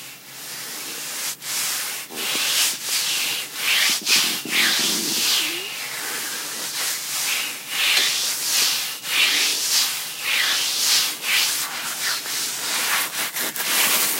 close-up of the noise of my thighs being scratched, mono recording. Sennheiser MKH 60 into Shure FP24 preamp, Edirol R09 recorder